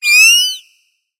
Moon Fauna - 138
Some synthetic animal vocalizations for you. Hop on your pitch bend wheel and make them even stranger. Distort them and freak out your neighbors.
creature, alien, synthetic, fauna, sfx, sound-effect, vocalization, animal, sci-fi